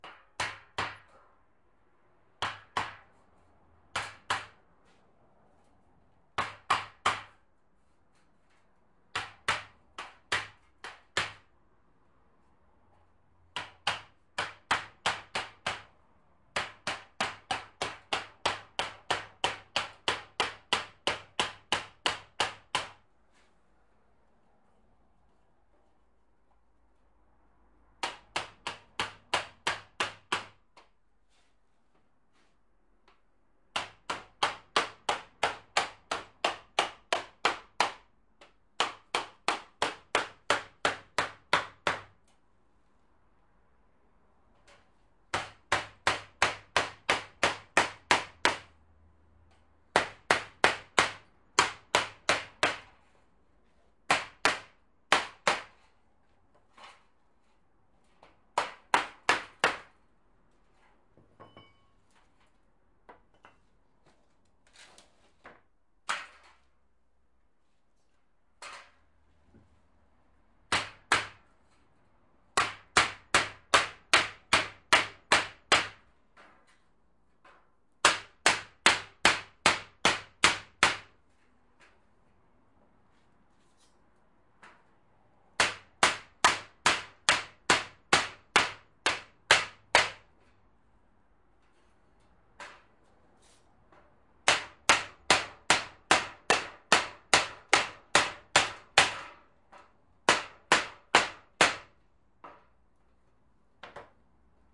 metalworking.hammer 4
Construction worker with a hammer knocking on metal.
Recorded 2012-09-30.